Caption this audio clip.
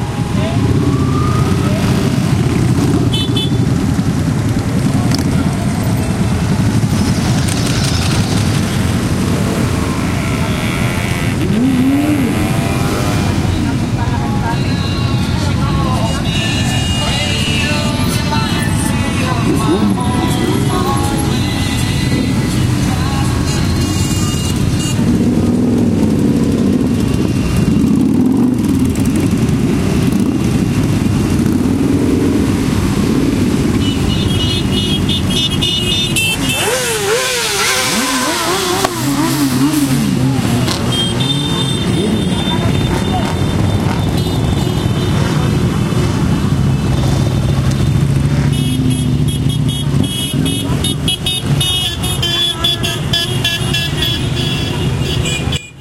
bikes roaring and honking as they pass during a motorcycle concentration, traffic, police sirens, some voices. Olympus LS10 internal mics. Recorded at Paseo de Colon, Sevilla, Spain
bike, engine, field-recording, harley-davidson, motorcycle, roaring, rumble